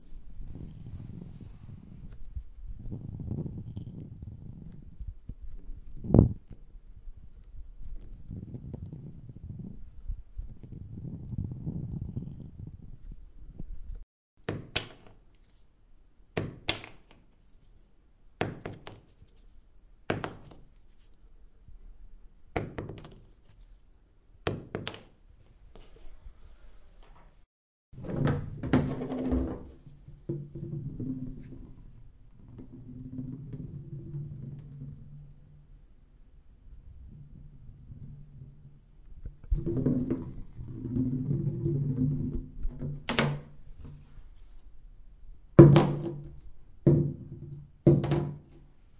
Duckt tape roll rolling on a stone bathroom floor and inside a bathtub.
bathroom, bathtub, duckt, floor, pd, rolling, stone, tape, tub